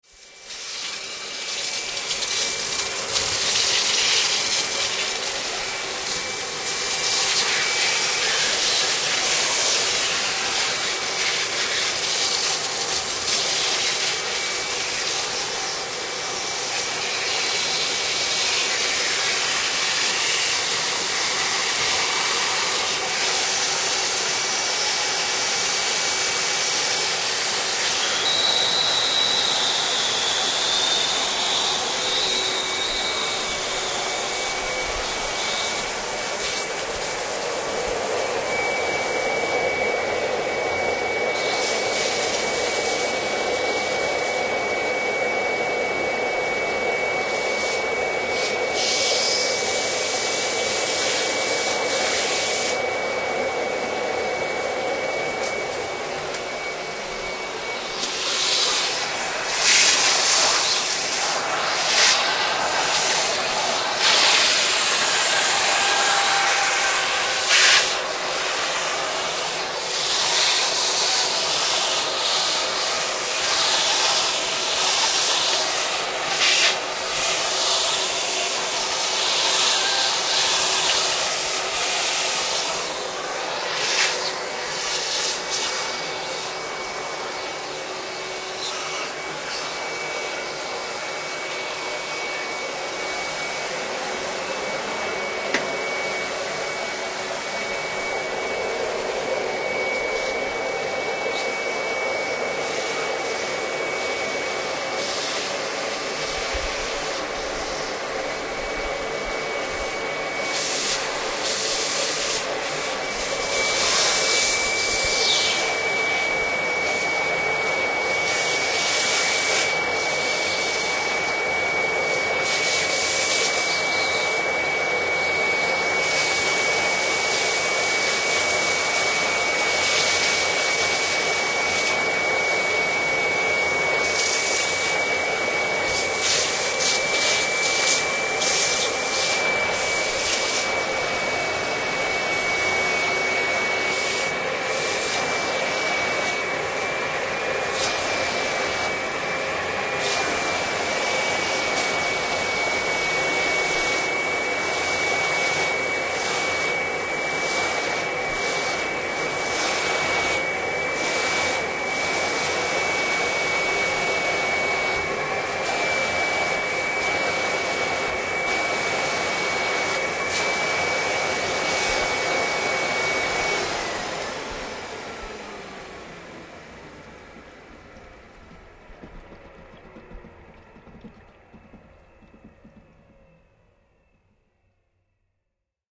Aspiradora casera / Home vacuum cleaner
Sonido de una aspiradora casera.
Grabado con una Zoom H1.
Sound of a home vacuum cleaner.
Recorded with a Zoom H1.